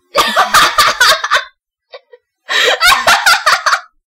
i recorded my voice while watching and listening to funny stuff to force real laughs out of me. this way i can have REAL laugh clips for stock instead of trying to fake it.